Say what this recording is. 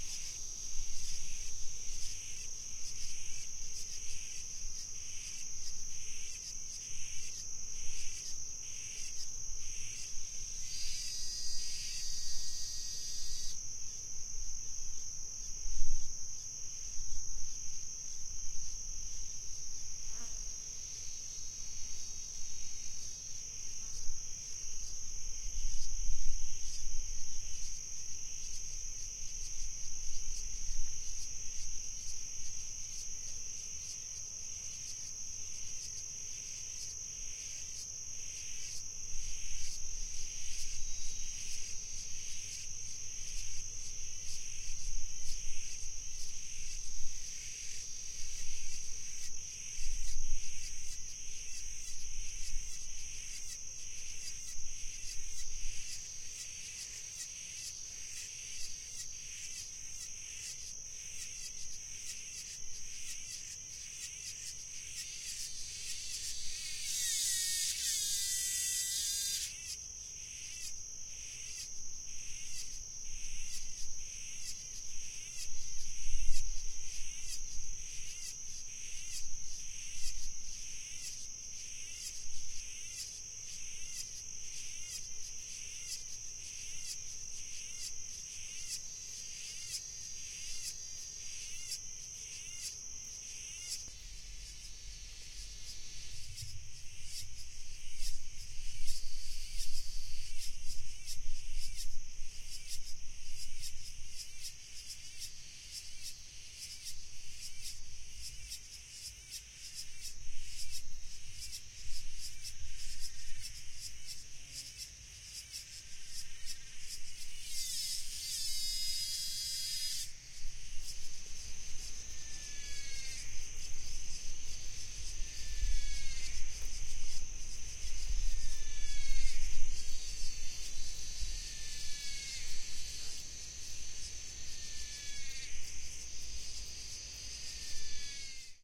Ambience Cowntryside Day crickets Atlantic Forest Brazil
Stereo ORTF (with cardioid mic) sound recording
South-of-Brazil, farm, cicada, crickets, ambience, Atlantic-Forest, Cowntryside